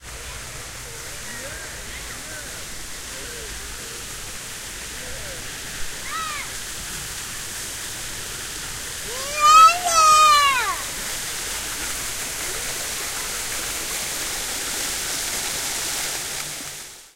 baby water
In the Italian Watergarden section at Longwood Gardens. People really like this part of the gardens, and kids especially. You can hear various yells and shouts in the distance, against the sound of splashing fountains. Suddenly, someone offers a succinct observation...